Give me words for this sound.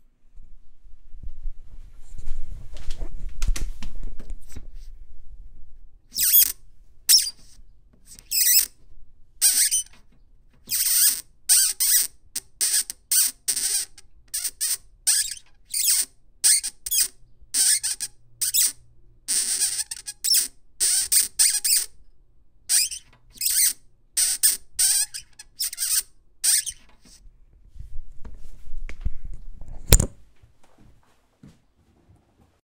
rhodes squeak
The squeaky sound my Rhodes makes when you push the sustain pedal. Recorded with an AT4021 mic into an Apogee Duet.
pedal, rhodes, squeak